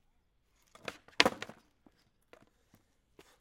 Skate jump on grass 5
Long board stake, hard wheels. Recorded with a Rode NT4 on a SoundDevices 702
grass, jump, long-board, skate